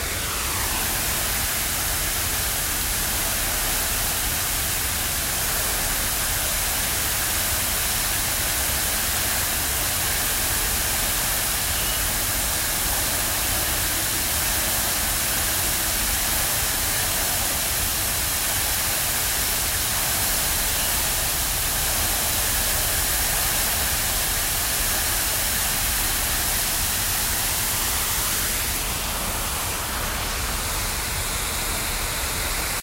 Hong Kong Fontain in Kowloon Park
fountain, park, water